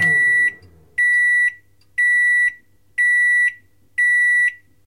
Microwave Bleeps 01
A microwave bleeps
beep, bleep, blip, Microwave